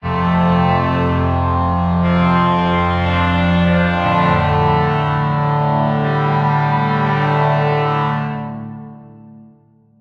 FORF Main Theme Strings 01
trailer, movie, epic, orchestral, strings, cinematic, soundtrack